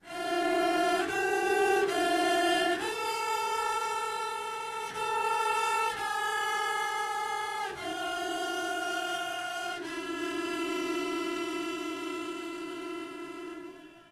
rain stochasticModelTransformation stftMorph
Granular effect over a rain sample by applying stochastic model transformation, (up)scaling time and applying low stoc. factor
granular, texture